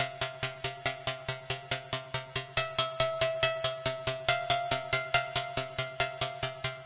melodic string pluck